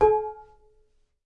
Tuned pan sound. Digitally recorded with Rode NT 5 Mics in the Studio. Recorded and edited with REAPER.